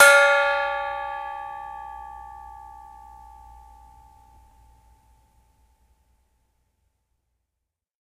A small gong around 6 inches across hanging in a wooden frame stuck with a black plastic mallet at various ranges with limited processing. Recorded with Olympus digital unit, inside and outside of each drum with various but minimal EQ and volume processing to make them usable.
percussion, gong, hit, mini